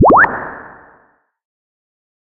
SFX suitable for vintage Sci Fi stuff.
Based on frequency modulation.
health, scifi, synth, vintage